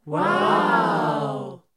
Crowd Wow Surprise People
A crowd is surprised. Wooow!